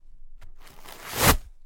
A tissue being pulled from a full box of tissues
balled, crumpled, paper, scrunched, tissue